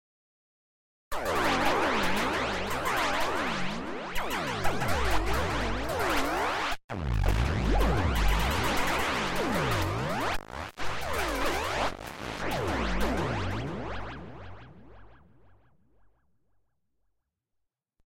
Multiple laser shots in quasi-battle sequence with echoes and swirls